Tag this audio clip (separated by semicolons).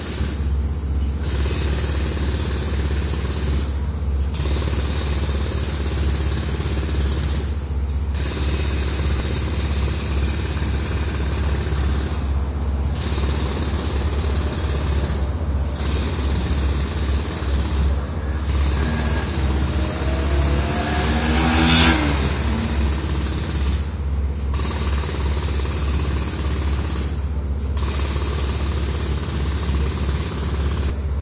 jackhammer; drill; Unedited